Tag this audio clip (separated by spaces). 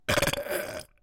belch burp